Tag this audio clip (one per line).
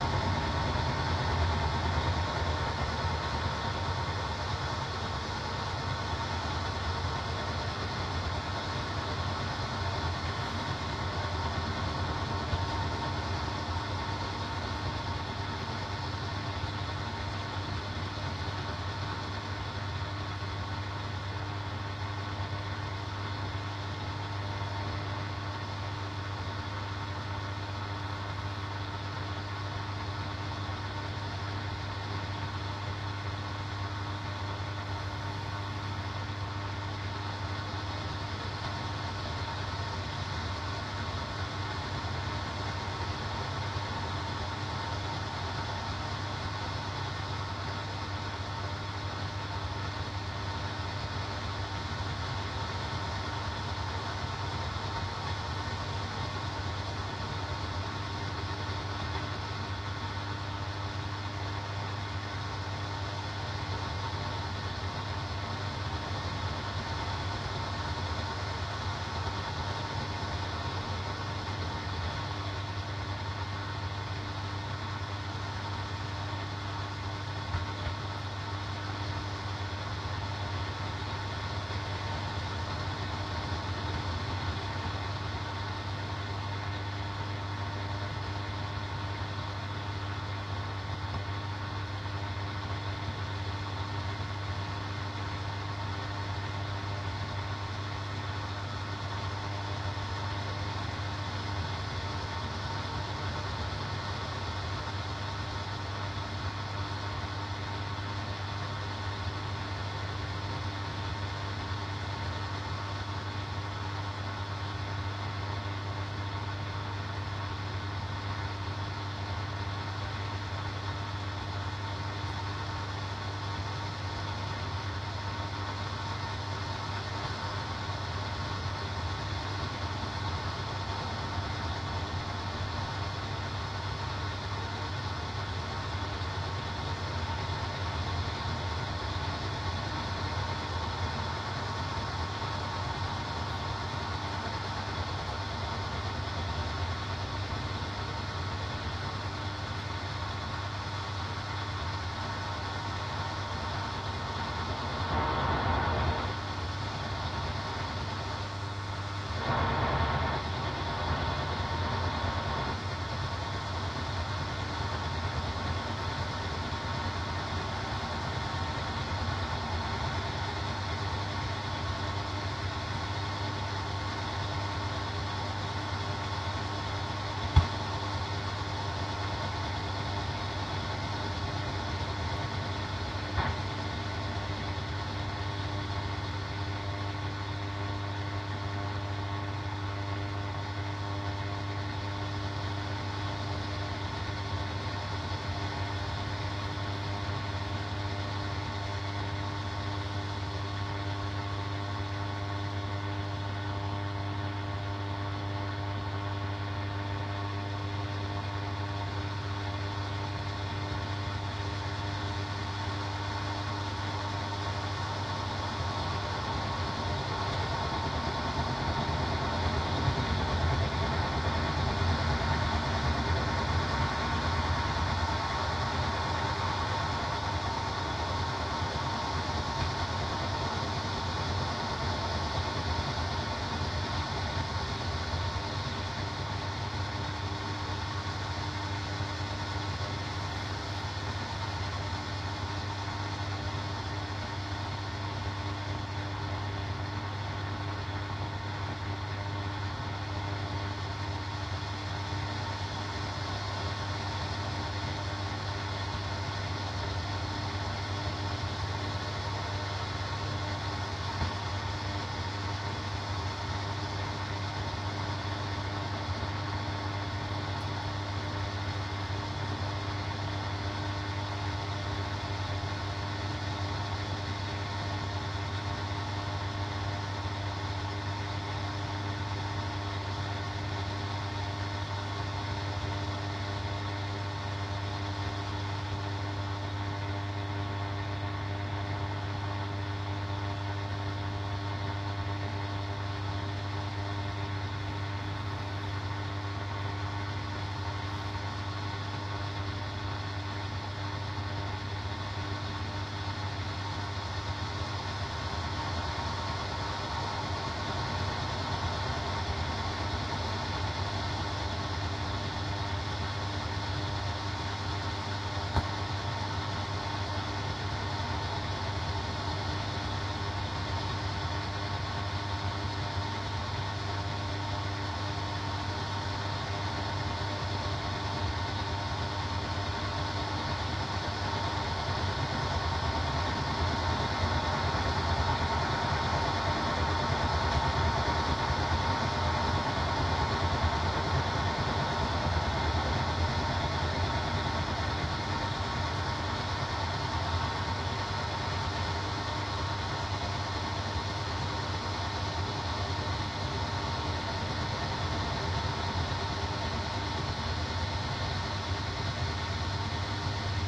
faint
hiss
interference
longwave
noise
radio
shortwave
signal
tube